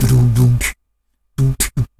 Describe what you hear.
Beatbox 01 Loop 015d DaBoom@120bpm
Beatboxing recorded with a cheap webmic in Ableton Live and edited with Audacity.
The webmic was so noisy and was picking up he sounds from the laptop fan that I decided to use a noise gate.
This is a cheesy beat at 120bpm with a big boom kick.
Several takes and variations. All slightly different.
120-bpm, loop, Dare-19, rhythm, bassdrum, beatbox, boomy, bass, boom, noise-gate, kick